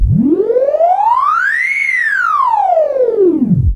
sonar slidewhistle
Jilly's sonar sound remanipulated through granulab in real time to create a slidewhistle...